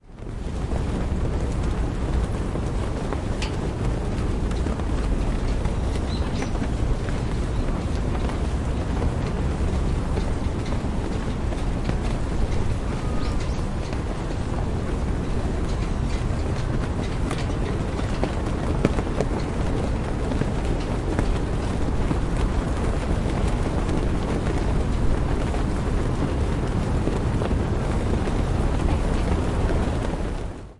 Flags movement at Olympic Park.
20120723